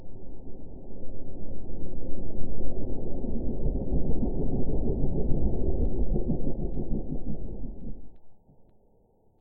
Slow Sci-Fi fly by created in Audacity using multiple layers of snoring, pitched down and slowed to .22x playback.
alien, by, fi, fiction, fly, futuristic, sci, science, science-fiction, sci-fi, scifi, slow, space